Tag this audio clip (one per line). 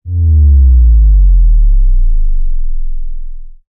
bass
bassy
cinematic
club
dance
drop
dubstep
effect
electronic
frequency
hit
house
impact
low
rave
sub
trance
wobble